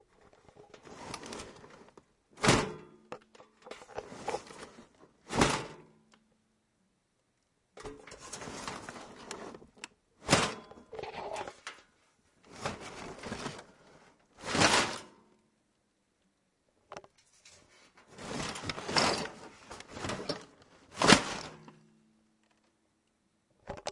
Lifting an exposed desktop PC case out of cardboard box and dropping it again. Recorded with TASCAM DR-1.